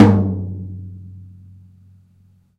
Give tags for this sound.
mid; tom

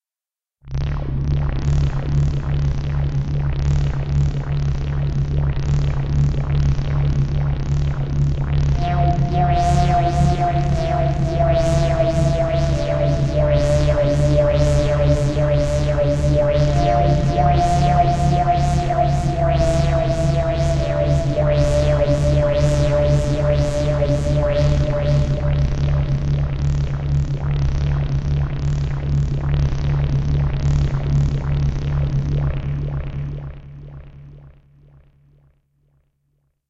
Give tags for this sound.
spaceship; impulsion; hover; energy; ambience; Room; effect; ambient; dark; sound-design; sci-fi; soundscape; emergency; future; pad; fx; deep; electronic; atmosphere; space; rumble; starship; noise; drone; machine; futuristic; engine; bridge; background; drive

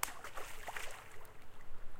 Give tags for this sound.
water; splash; nature

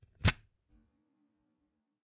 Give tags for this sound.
Computer; CZ; Czech; out; Panska; plugging; USB

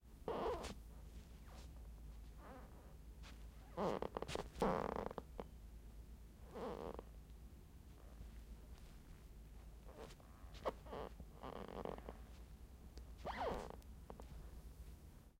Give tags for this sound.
wooden; steps; floorboard; creaks

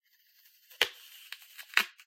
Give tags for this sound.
Apple; Bite; Chew; Crunch; Eat; Eating; Fruit

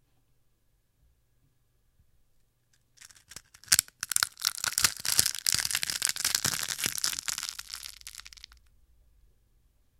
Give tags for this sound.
field-recording; horror-fx; Cracking-Eggshell; effects; horror

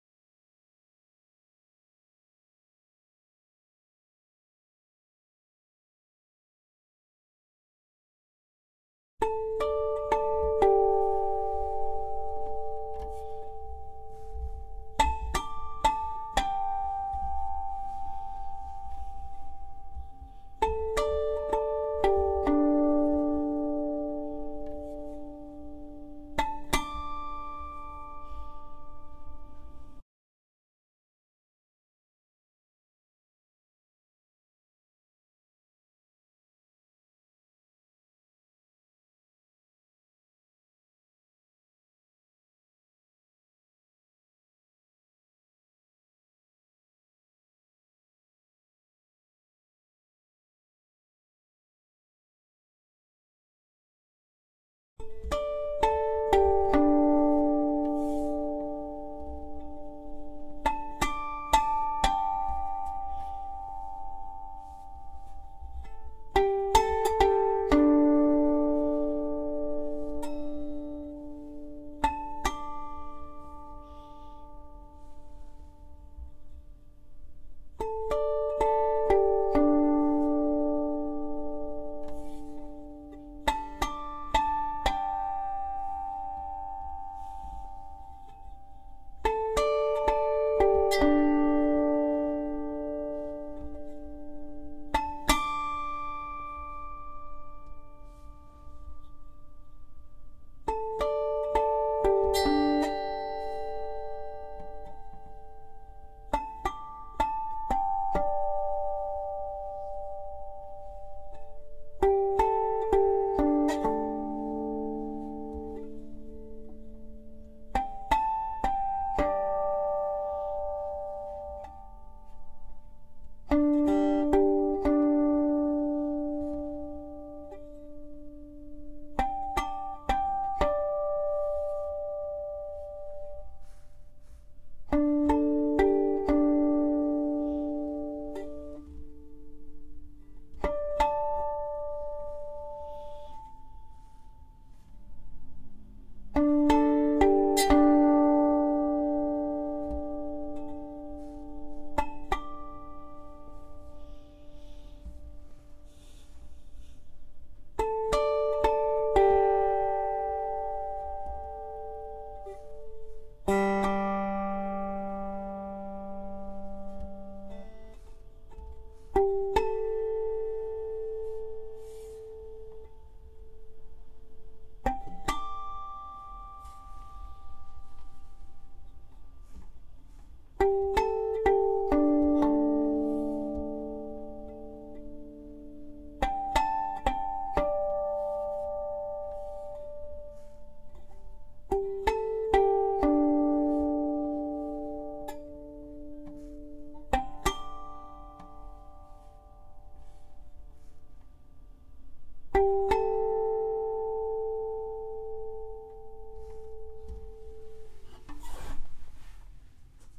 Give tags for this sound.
acoustic-guitar; dobro

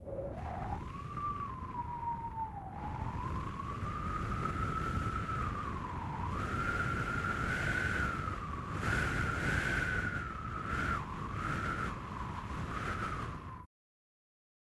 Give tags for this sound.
Wind; Storm; Windy; Breeze; Arctic

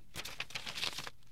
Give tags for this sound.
paper; folding; origami; fold

